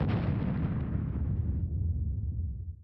Compressed Nova Sound Galaxy Bomb Explosion Boom Rumble FX - Nova Sound
5, 7, 8, 8-bit, 8bit, Arms, Bombs, Cyber, Dark, Digi, Digital, Explosive, Fire, Firearms, Flames, Gunner, Guns, Nova, Shoot, Shooter, Shot, Shotting, Sound, Weapons, bit, bits